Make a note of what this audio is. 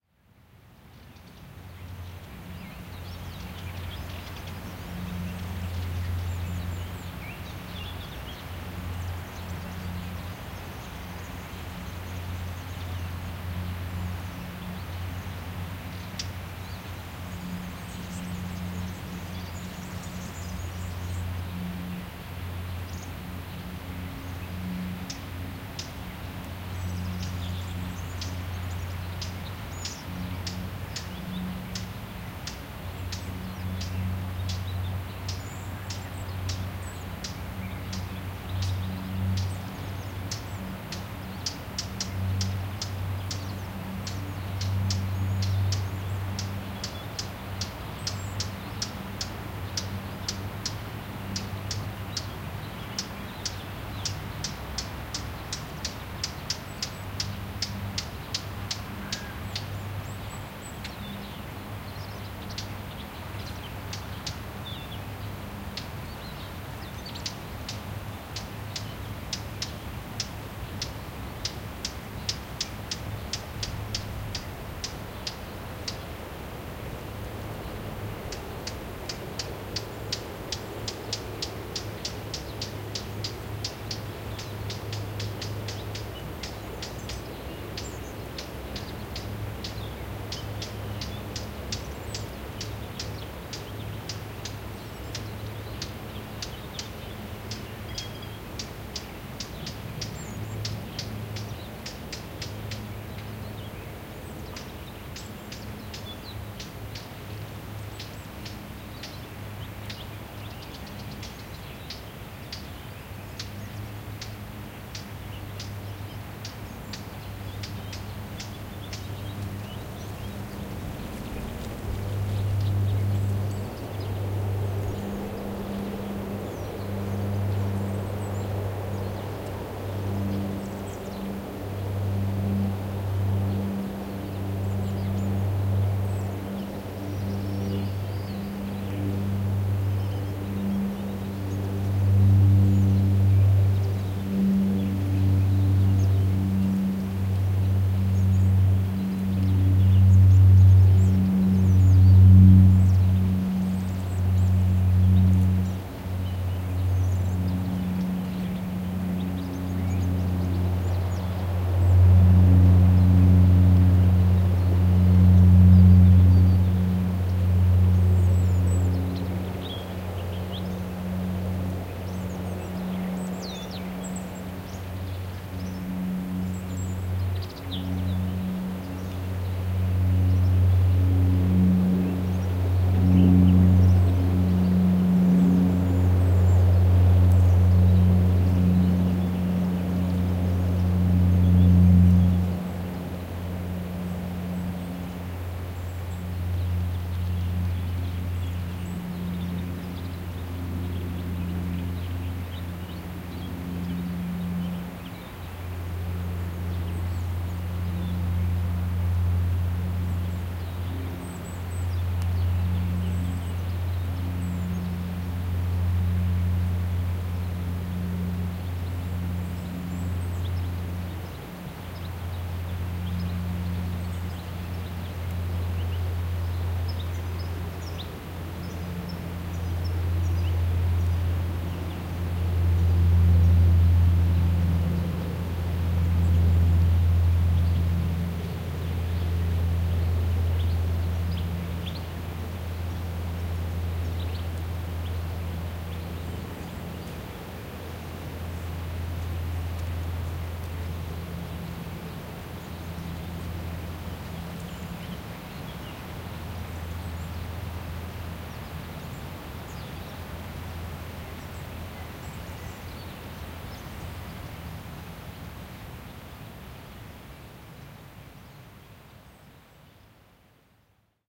20180221 plane.over.forest
Rumble of an airplane overheading pine forest, with wind on trees and many bird (mostly Warbler) calls. Sennheiser MKH 60 + MKH 30 into Shure FP24 preamp, Tascam DR-60D MkII recorder. Decoded to mid-side stereo with free Voxengo VST plugin
airplane, birds, nature